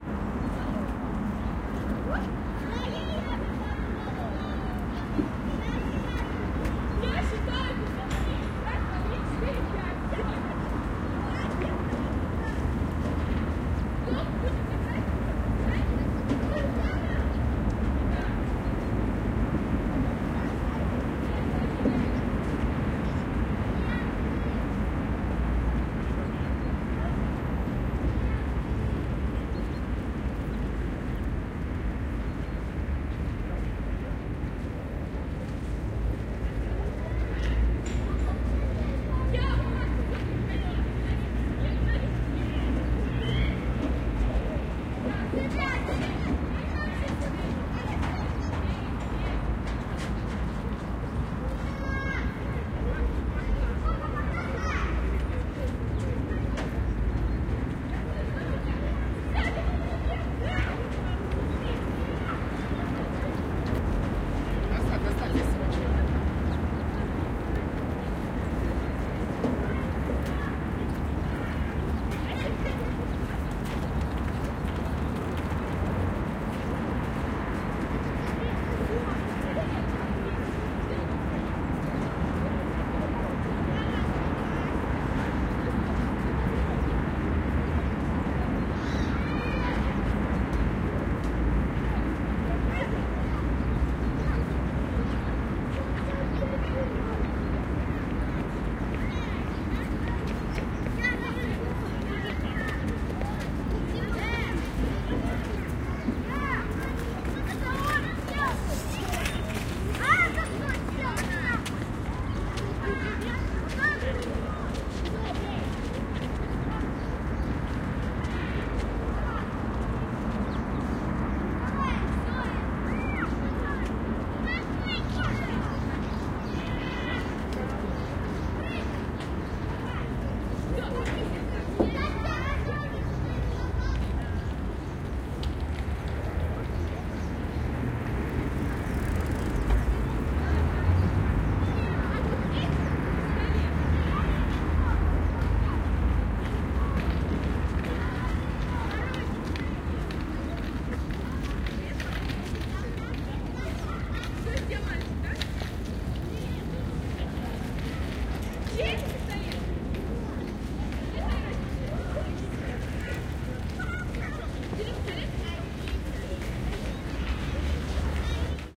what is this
ambience, residential area, boulevard with playground, traffic in bg, children playing
ambience, field-recording, Moscow, Russia